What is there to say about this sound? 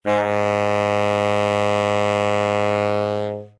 An overblown low concert A flat from the alto sax of Howie Smith.
alto, howie, overblown, sax, smith